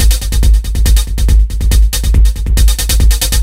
Thank you, enjoy
drums, beats, drum-loop